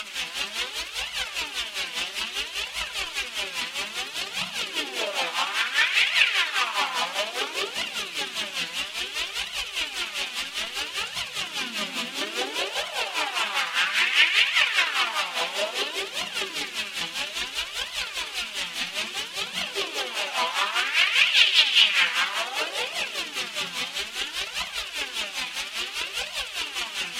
Alien junkyard
A widely panned gritty atmosphere
distorted, panned, metallic, flanged